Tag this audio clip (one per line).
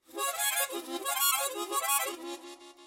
chromatic
harmonica